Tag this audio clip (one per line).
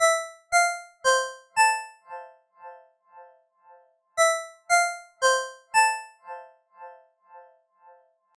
alerts
ring
cellphone
phone
mojo
alert
cell-phone
ring-tone
alarm
cell
mojomills
ringtone
mills